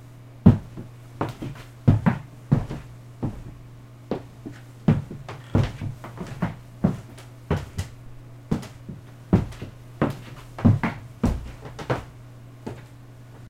Walking (Footsteps)
Walking on a wood floor.
walk, footsteps, floor, foot, walking, shoes, feet, sound